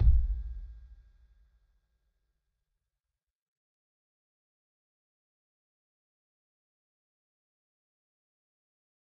Kick Of God Bed 019
drum, god, home, kick, kit, pack, record, trash